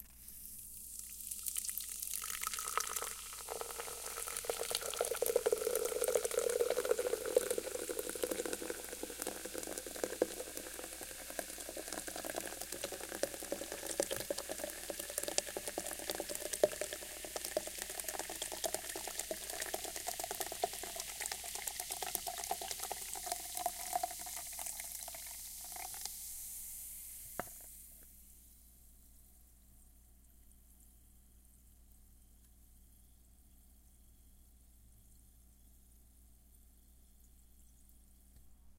large Monster Energy Drink Pouring Into Root Beer Style Cup 2
Another take of a Large Monster Energy Drink pouring into a Root Beer style glass mug that's completely empty.
energy, energy-drink, Monster, soda